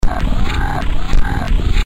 sound-design created to sound like animals feeding (no field recording
of animals feeding was used, though); made with Native Instruments Reaktor and Adobe Audition
sound-design, rhythmic, processed, 1-bar, dark, industrial, electronic, loop